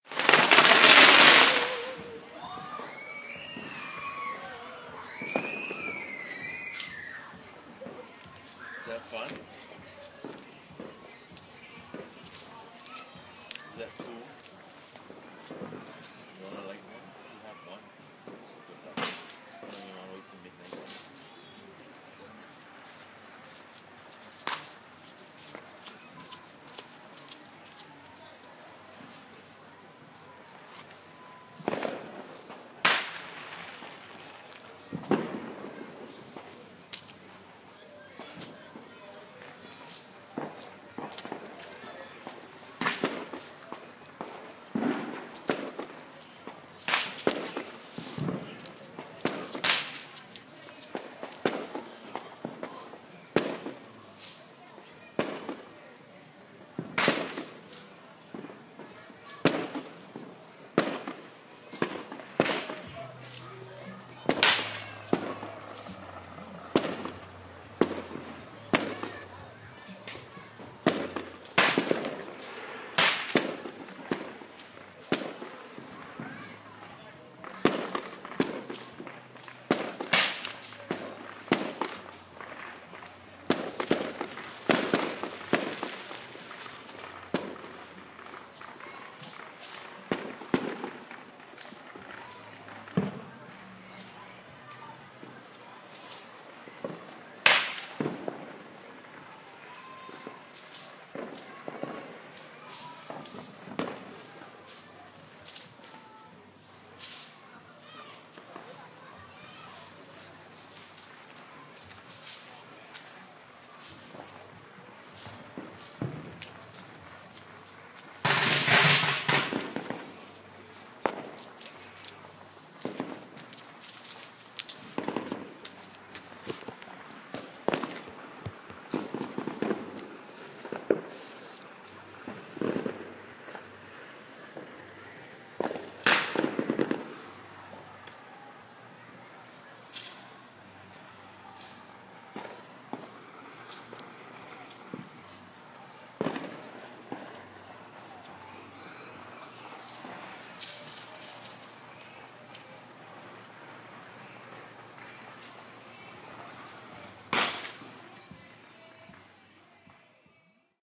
Recorded 11:00 PM. Recorded with an iPhone. Not very loud fireworks. Almost the new year! Just gotta record 11:30 and 12:00. Happy new year everybody!